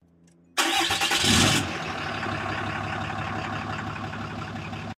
Truck-Diesel 07Dodge Start
Truck-Diesel, Start, 07Dodge